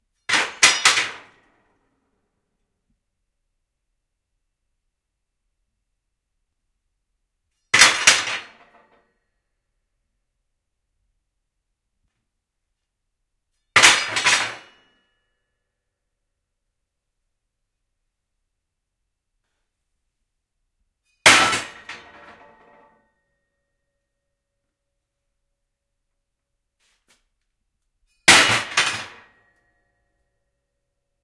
metal piece drop fall on floor
fall, piece, drop, floor, metal